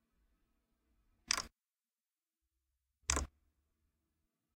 The clicking noise of a smartphone turning off.